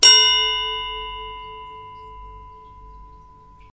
home-made bell, a steel ashtray hit with a rod /cenicero metalico golpeado con una varilla
bells, house